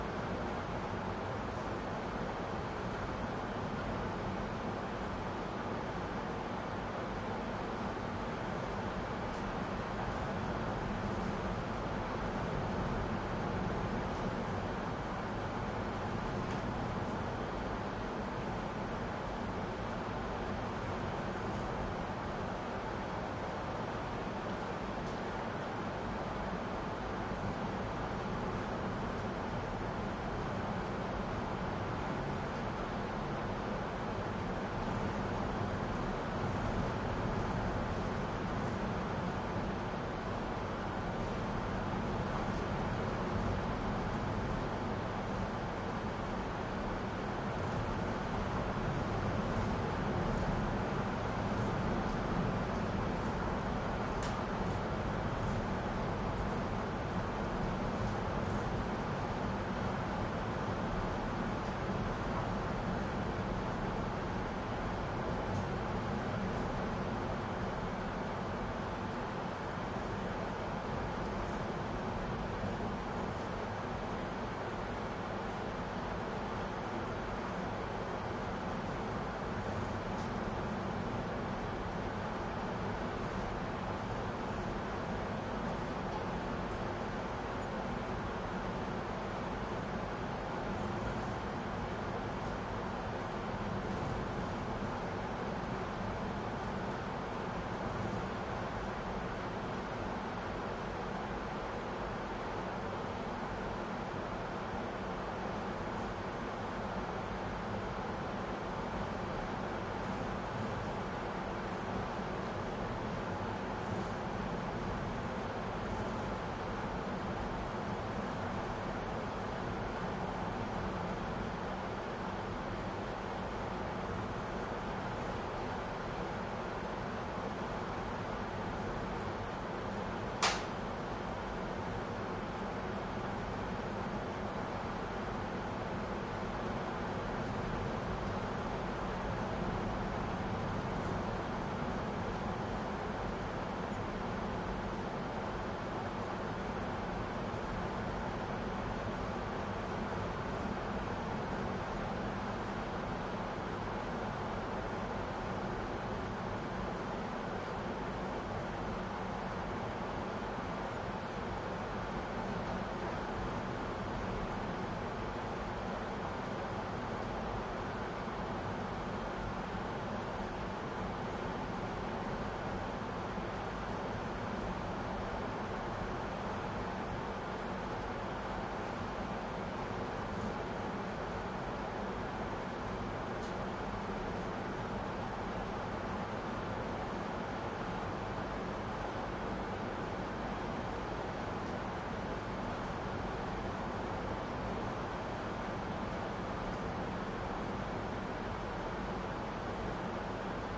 heavy wind outside hotel room airy ambience with ceiling rattles Gaza 2016
hotel, wind, room